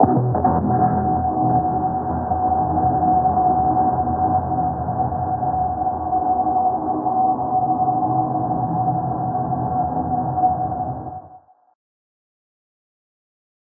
Solar startup (338268 Erokia's 'Playstation Style Power On Sound Effect')
Solar boot up.
A resampling of Erokia's 'Playstation Style Power On Sound Effect'. The sample was transposed an octave up and processed with a lowpass filter and a bell eq boost to the mid frequencies.
solar-system, star, wide, digital, electric, sound-effect, system, deep, startup, sounddesign, effect, sound-design, start-fx, sun, soundeffect, future, sfx, erokia, sci-fi, sound, space, abstract, starsystem, device-power-on-sound-1, solar-startup, star-system, fx, solar, phrase-FX